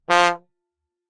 One-shot from Versilian Studios Chamber Orchestra 2: Community Edition sampling project.
Instrument family: Brass
Instrument: OldTrombone
Articulation: short
Note: F2
Midi note: 42
Room type: Band Rehearsal Space
Microphone: 2x SM-57 spaced pair
brass, f2, midi-note-42, multisample, oldtrombone, short, single-note, vsco-2